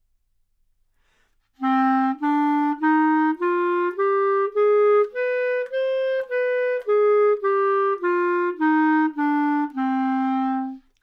Clarinet - C natural minor
Part of the Good-sounds dataset of monophonic instrumental sounds.
instrument::clarinet
note::C
good-sounds-id::7619
mode::natural minor
clarinet, good-sounds, minor